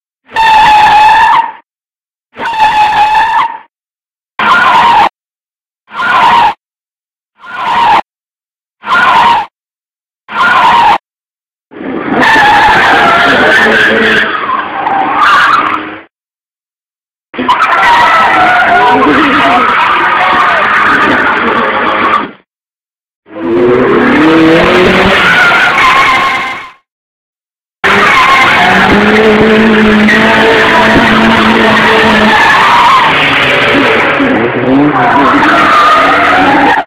Car applying breaks at high speed. 11 Sounds. If you can make a million bucks off it, awesome!
brakes, breaks, car, engine, rev, revving, screech, screeching, stop